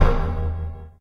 Electronic percussion created with Metaphysical Function from Native Instruments within Cubase SX.
Mastering done within Wavelab using Elemental Audio and TC plugins. A
low frequency sound effect for your synthetic drum programming
pleasure. Sounds like a special tom with some added distortion noise.